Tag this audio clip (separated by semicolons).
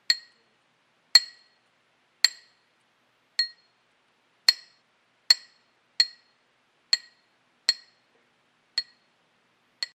cheers; clink; glass; hit; shot-glass; toast